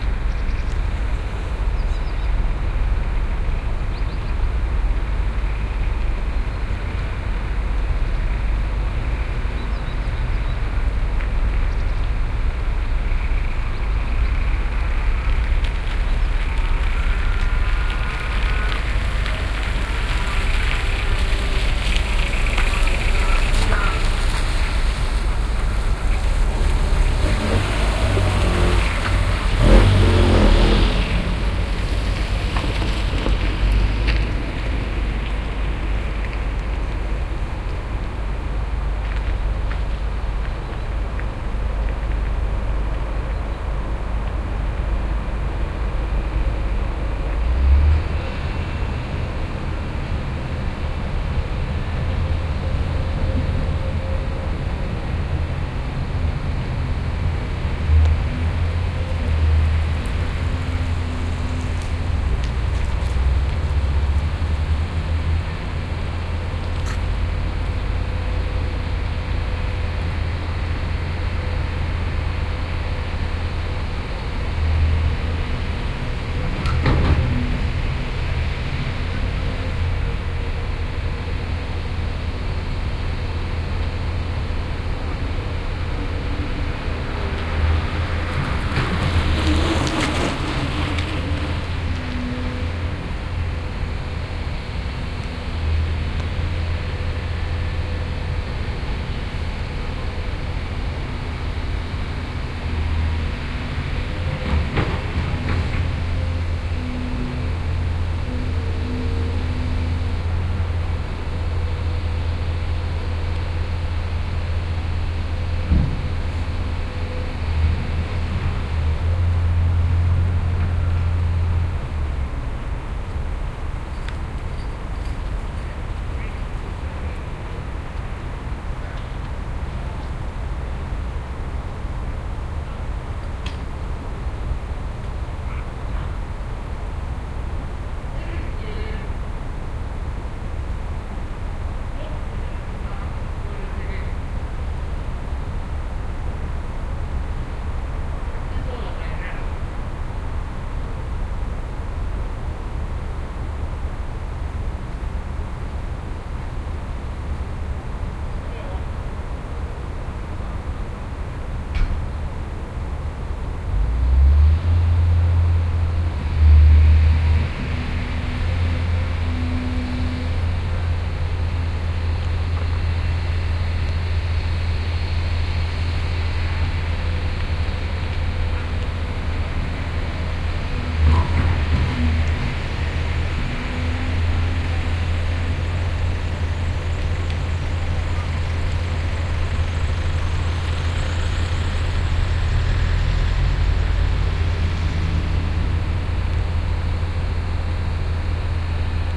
Construction work in Jyväskylä, central Finland 8 o'clock am.
Jyv, construction, work, skyl